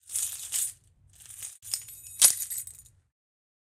Keys Rattle & Throw
Recorded myself throwing keys into the air to capture some Foley.
car, door, house, Keys-Throwing